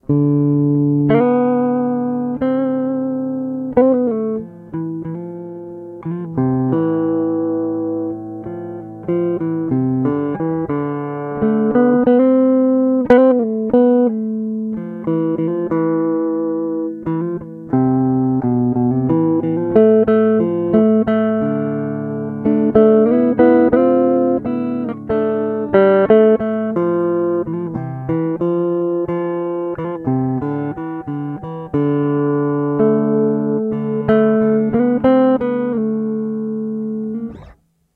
Dmajor folktheme 90bpm
chords, Dmajor, guitar, guitar-chords, rhythm-guitar